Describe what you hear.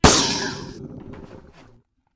video game sounds games
games, sounds, video